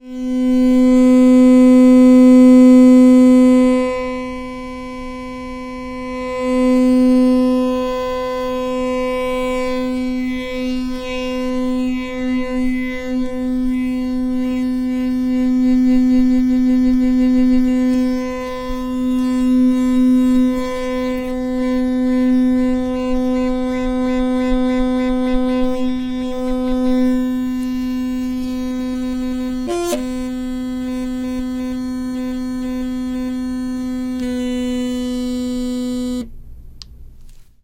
Buzzes made using an electric toothbrush.
buzz, electric, vibrate, whir, toothbrush